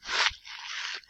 monster eat3
Monster eating flesh.
blood, creature, creepy, eat, flesh, horror, meat, monster, scary